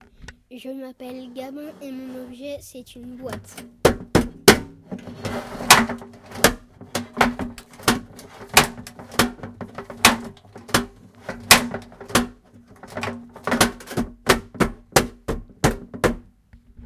Gabin-boite
mysound, France, saint-guinoux